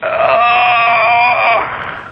kidney nr
First step on process of mangling sounds from phone sample pack. Noise reduction added.
male, pain, processed, scream, voice